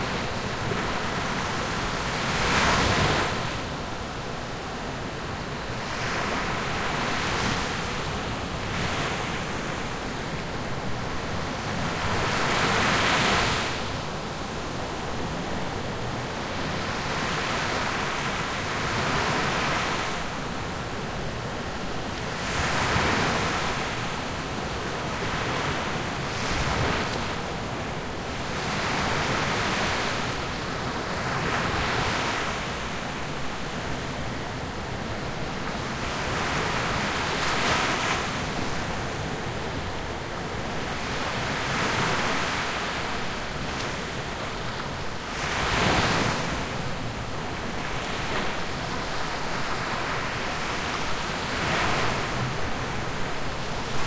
Beach Waves - Medium Distance
I recorded some beach waves at a beach in Florida at a medium distance with my Tascam DR-05.
waves, shore, beach, close, distance, seashore